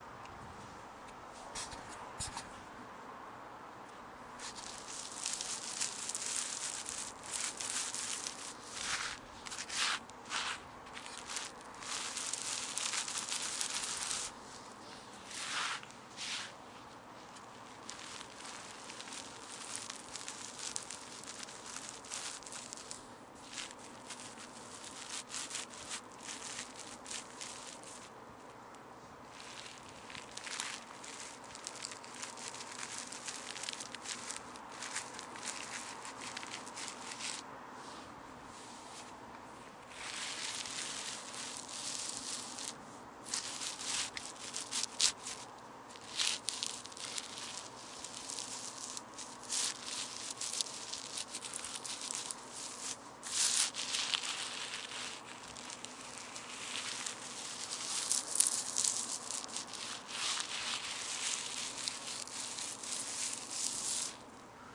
Slow and fast sounds of a hand rubbing against dirty concrete. Very rough, wet sounding hand movement. Processed to remove some background noise.